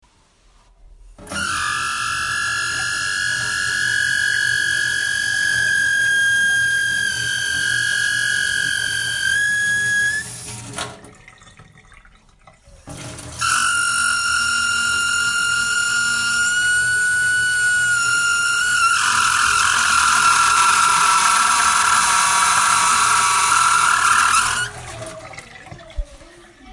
Tap water vibration
An old tap water installation vibrates